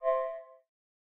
Hum 02 high 2015-06-22
a user interface sound for a game